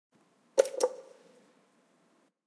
Elevator Button 1
Button, click, Elevator, push, pushing, switch